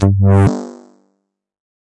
Synth Stab 1
High pitch synth stab with resonant filter.
[Root: G]
Dance, EDM, Electric, Filter, Filtered, Freq, Glitch, High, High-Freq, Hit, House, Lead, One, Res, Resonant, Stab, Synth, Synth-Hit, Synth-Stab, Synthesizer